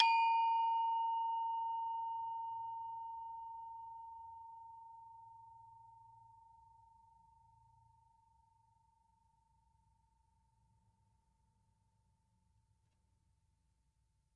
Bwana Kumala Gangsa Pemadé 16
University of North Texas Gamelan Bwana Kumala Pemadé recording 16. Recorded in 2006.
bali, percussion